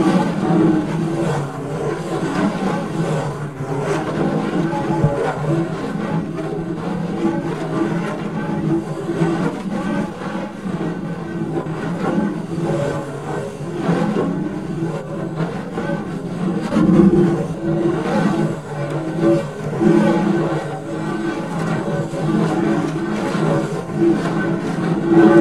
fire - memory #3 fires of Kuwait, oil wells burning .acoustic without overdubs or electronic processing.